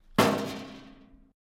Metal Hit 2
Hitting the side of a metal wheelbarrow with an axe. Could be used for a car crash, metal dumpster, all kinds of large metallic impacts.
hit, metallic, impact, car, thud